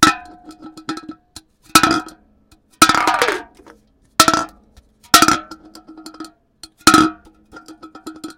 En Drink Dropping
Dropping an Energy Drink can. Could be a soda can too.
can, pop, metal, ting, energy-drink, drop, soda, twang, aluminum